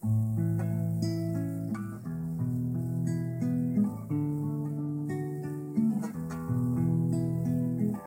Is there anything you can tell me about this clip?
A E D E chord progression in 6 8 time
Musical instrument: YAMAHA CG-101 classical guitar
Recorder: Nexus 5 mobile phone
Software: Built-in video camera
Date: 20150505
6-8-time, A-E-D-E, chord-progression